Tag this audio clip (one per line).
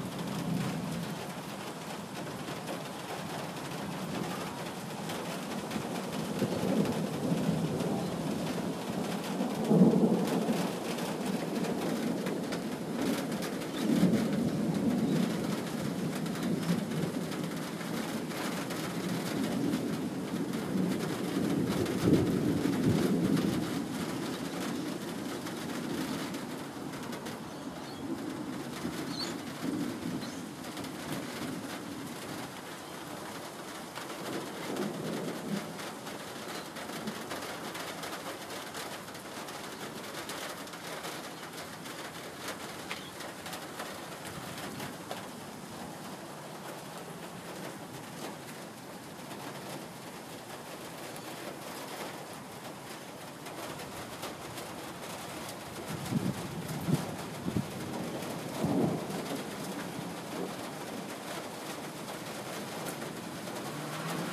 rain
hail